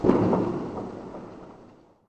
Cut of a firework